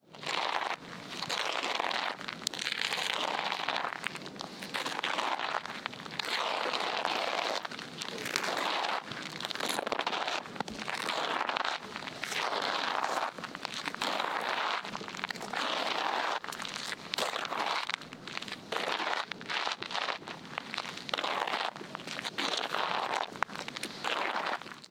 05 walk gravel
Gravel is štěrk. I did not know. Now I do. Heres the sound